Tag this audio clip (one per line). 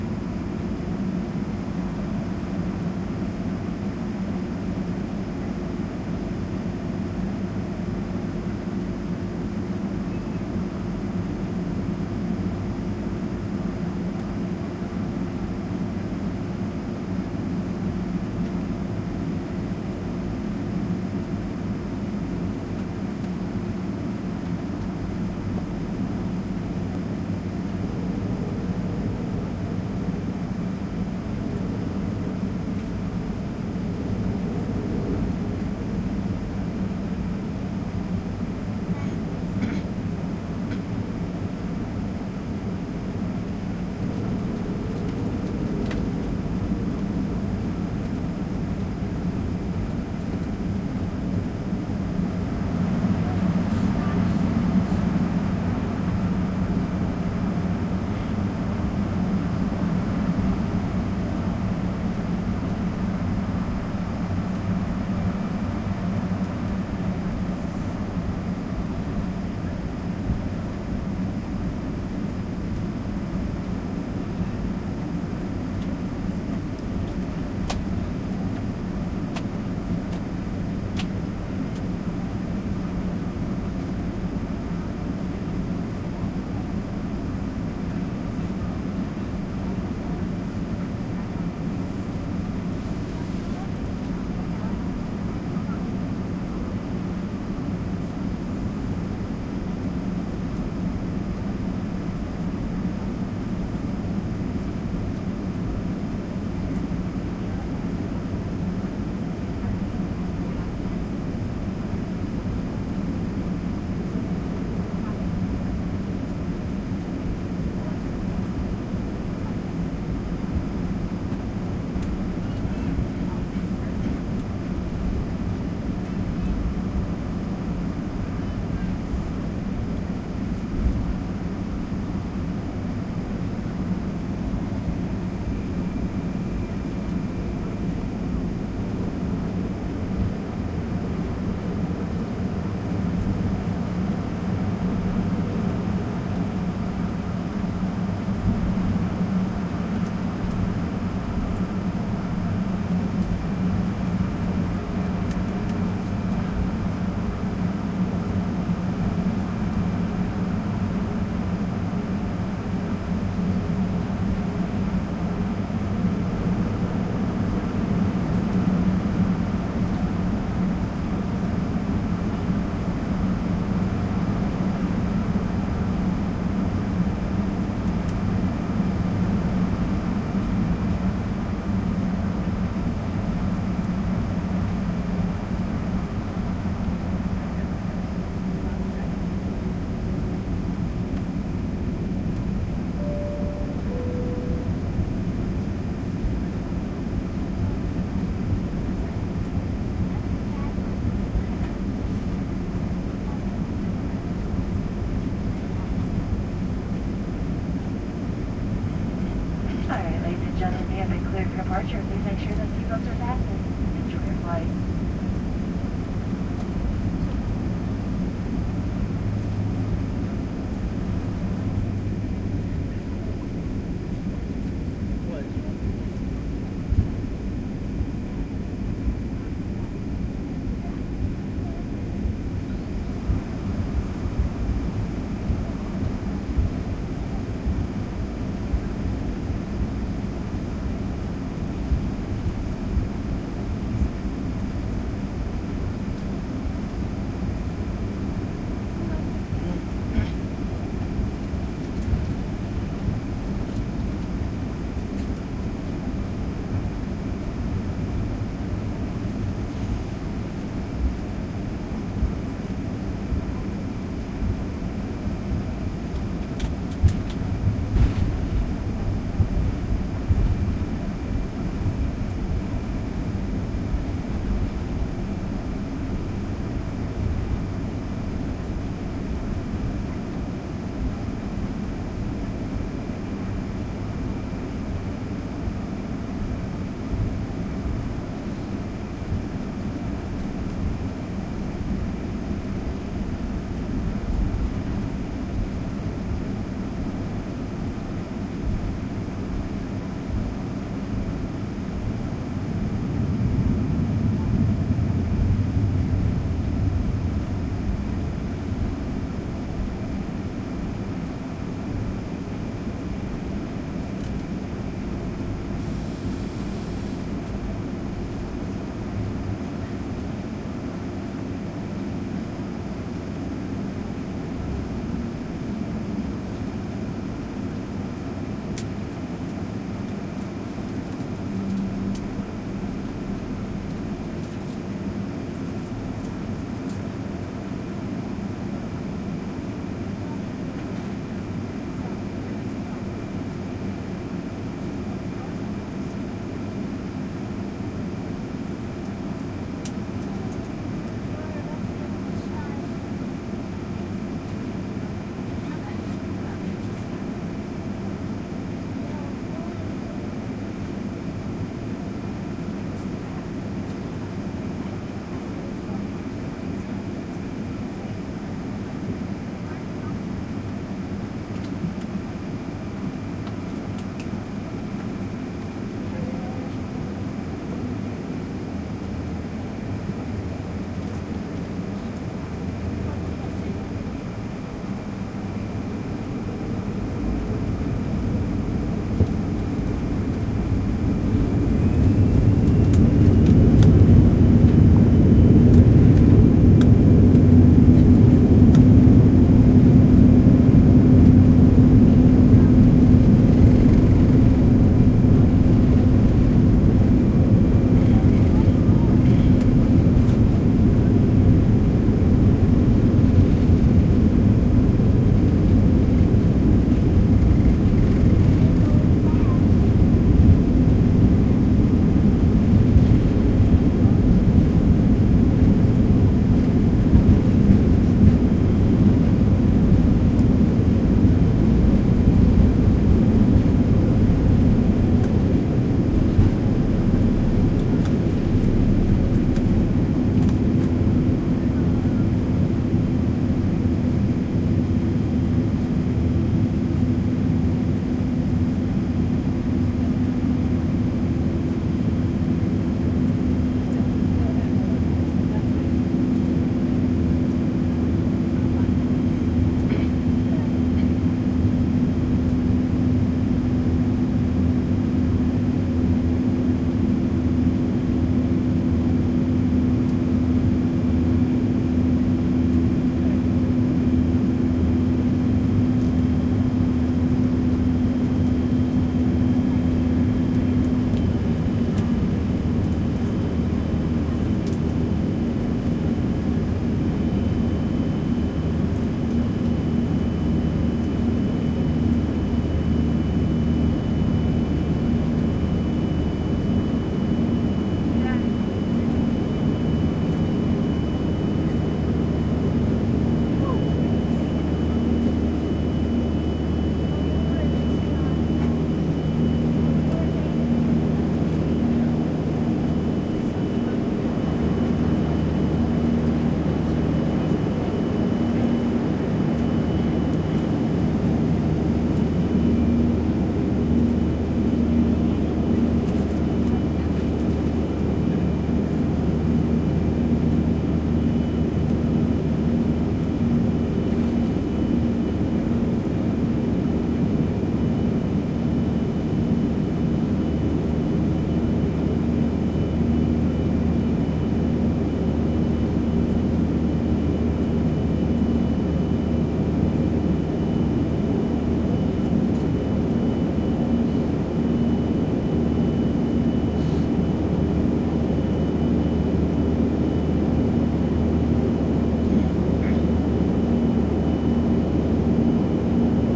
plane
cabin
take-off
airplane